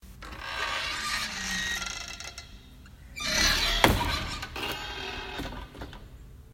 I recorded this classic creaking door opening, pausing, and then slamming shut at a campground in Wisconsin.
creepy-door-opening, door-slam